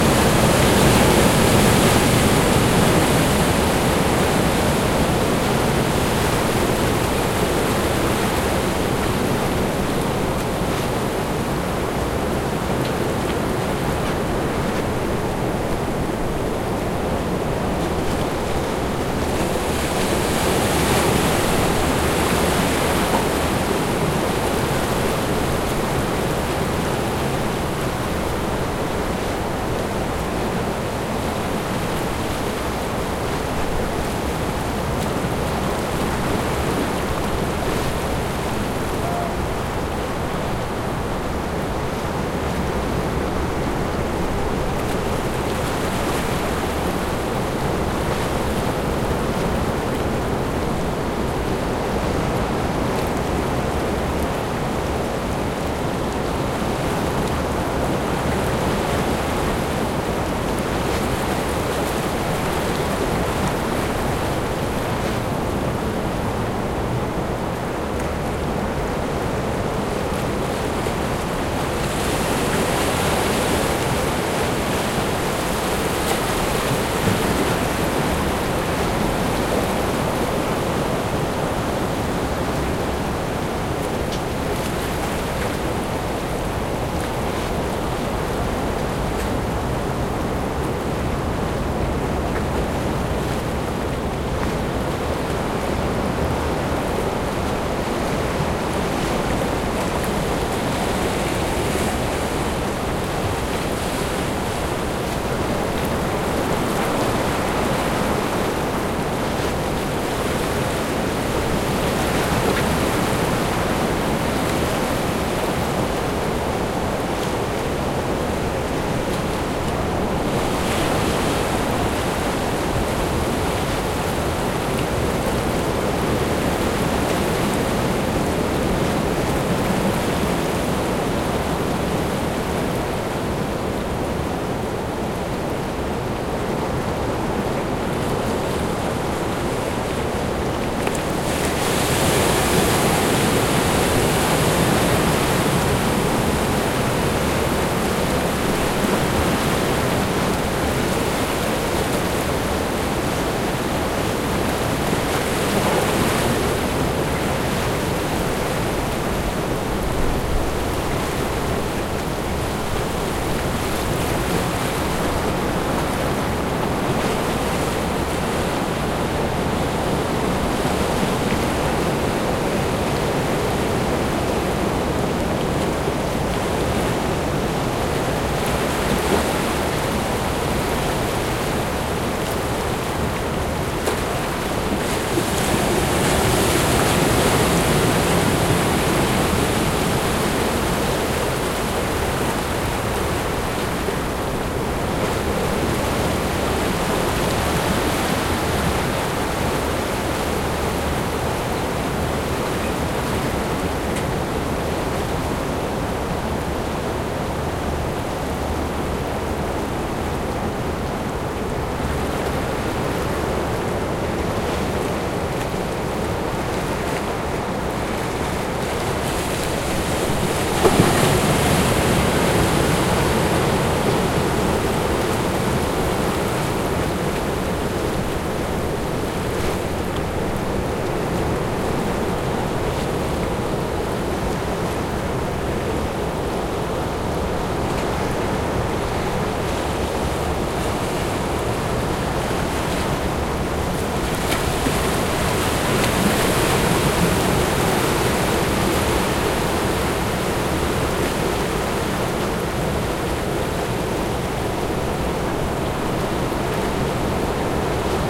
Mar desde la escollera de costado
Mar con turbulencia mediana, tomado de costado.
Medium-swirl sea recorded from the side.
mar, ocean, sea